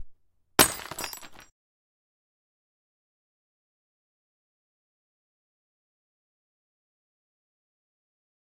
OWI Crystalclass shatter
crystal glass being shattered
broken-glass, break-glass, glass-shatter, shatterglass-smash, glass, shatter-glass, crystal, shatter